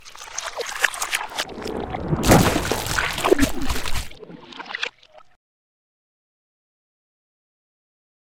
Disgusting Gush
A freaky gush effect with reversed water samples. Mainly a test sample.
Note: has markers that say barnacle vomit - not its intention (but can be used as that if you want), as I was sound modding Half Life's barnacle with this sound.
plop, inhuman, bloody, splat, gore, weird, gusher, wet, gushing, soggy